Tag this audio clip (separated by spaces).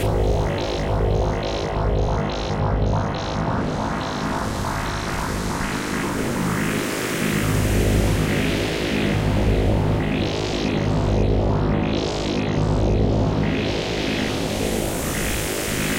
electronica noise experimental